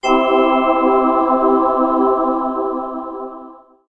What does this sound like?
Angel chorus1
artificial, game, fx, fantasy, science-fiction